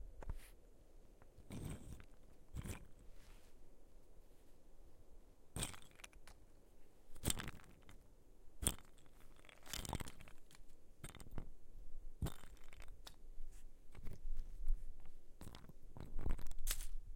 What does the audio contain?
Wood chips
I literally dropped pieces of bark onto a rock in the Sequoia National forest. This was recorded September 4th 2014 on Ten Mile Creek in Hume, CA on a digital hand recorder (sorry I don't remember which type) only trimming has been done in audacity, not other editing.
wood, casino, chips